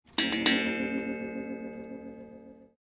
Mono track recorded with a Rode NT1. I close-miked a cuckoo clock that I was holding and struck it lightly to set off some of its chimes in a chaotic way.